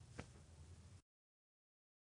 perro cayendo muerto sobre el cuido envenenado
perro, caer, muerte